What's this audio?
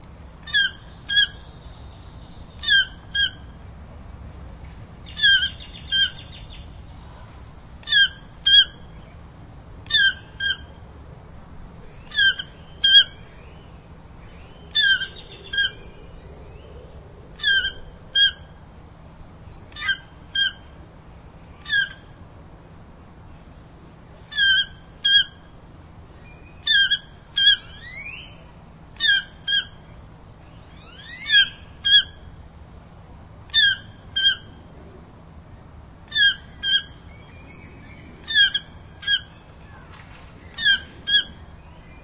chesnut mandibled toucan
Close-up recording of a female Chesnut-mandibled Toucan, with some other birds in the background. Recorded with a Zoom H2.
toucan, bird, exotic, zoo, tropical